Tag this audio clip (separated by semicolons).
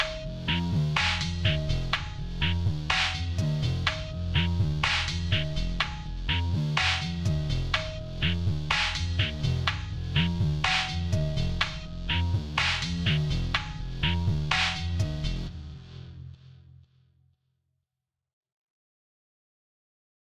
loop; sad; electronic; piano; industrial; rhythmic; music; 124-bpm